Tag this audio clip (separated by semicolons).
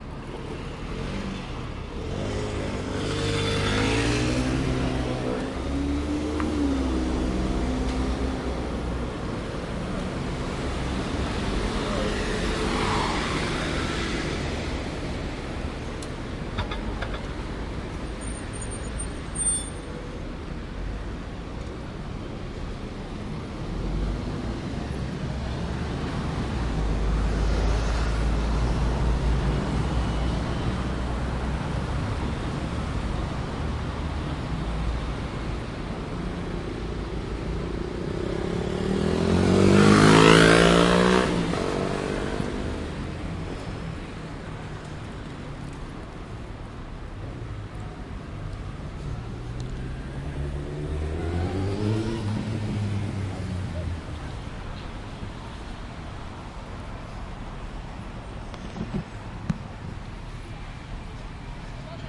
people; street; scooter